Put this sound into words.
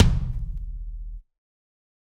Kick Of God Wet 024
set, pack